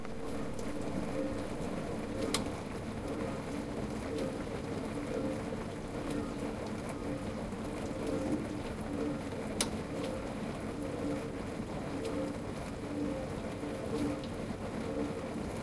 dish washer in action